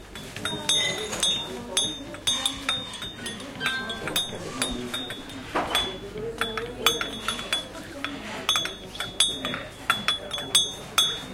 20100402.Brugge.teahouse
spoon stirring a cup of tea, voices in background. Recorded in a teahouse in Bruges (Brugge, Brujas), Belgium. Olympus LS10 internal mics
clink, cup, cuttlery, liquid, spoon, stirring, tea